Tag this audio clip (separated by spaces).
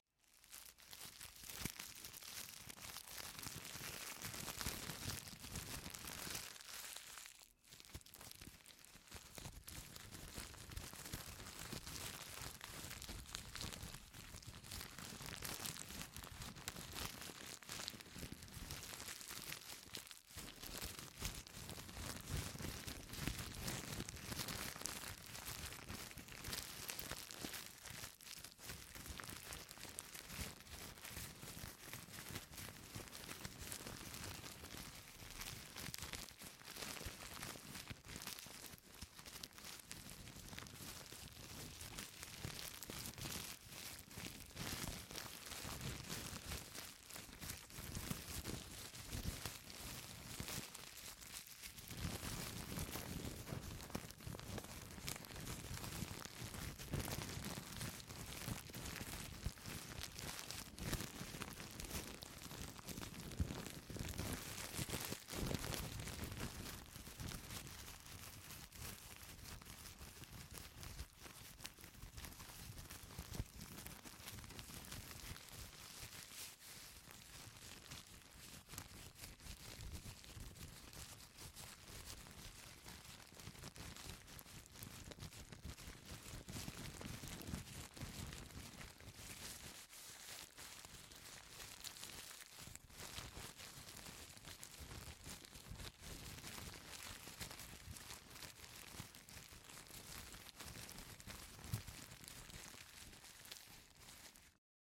ambient ambience amateur-recording MTC500-M002-s14 paper-crumpling wax-paper-crumpling noise noisey